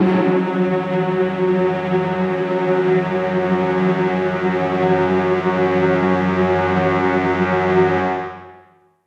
Spook Orchestra F#2
Spook Orchestra [Instrument]
Instrument, Spook